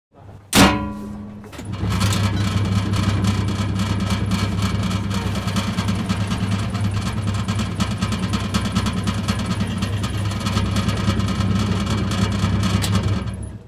Bread Slicer,Bakery Equipment,Metal,Rattle
I did this recording in Belgium. I was fooling around with a recorder on a set, and while the crew was setting up, I found this props and couldn't resist to slice a bread. So enjoy it.
rattle, Bread, bakery, slicer, equipment, metal